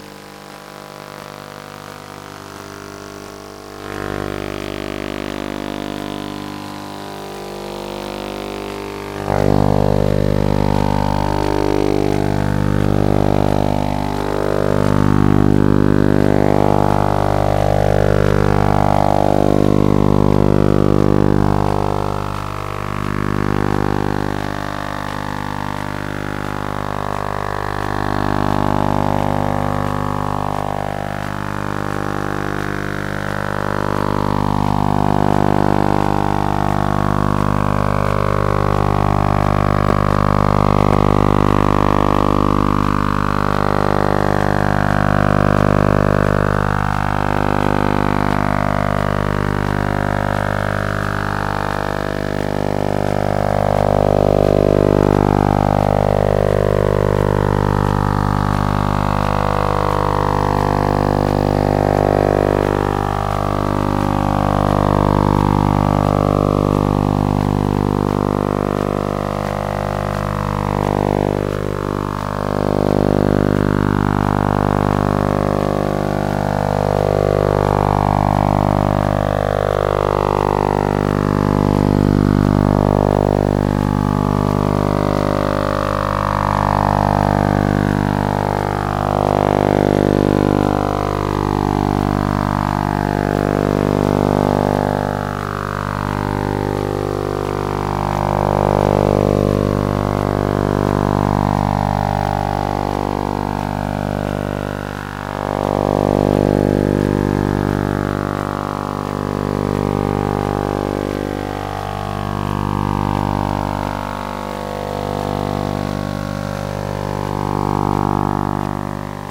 a door lock scanner at the newly build buero building at the Dortmunder U. beautyfully changing overtones, rich structure.
equipment: EM scanner, coil, Zoom H4
recorded in Dortmund at the workshop "demons in the aether" about using electromagnetic phenomena in art. 9. - 11. may 2008
door, swipe, electromagnetic, card, soundscape, lock, field-recording, scanner